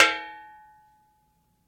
Chair-Folding Chair-Metal-Back Hit-09
The sound of a metal folding chair's back being flicked with a finger.
Impact, tink, bang, Hit, Metal